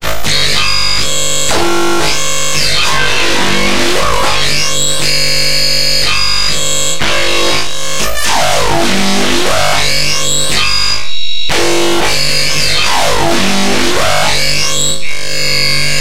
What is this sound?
beat steet hardflp
hard-psy, neuro-sample, dark-town-record